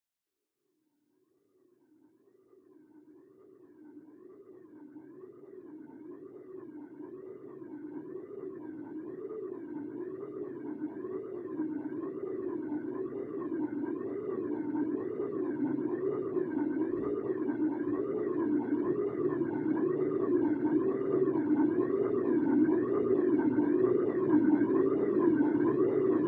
Adriana Lopez - Spaceship
Spaceship sound created from silence